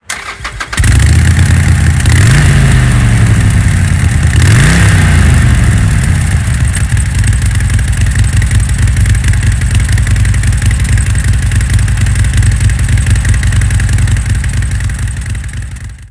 Harley Davidson Engine Start
A Harley Davidson's engine starting.
Motorcycle, Start, Harley, Transportation, Engine, Turn